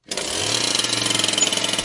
Chainsaw Pull Start Success
Machinery, medium, machine, electric, engine, high, Buzz, Rev, Factory, motor, low, Mechanical, Industrial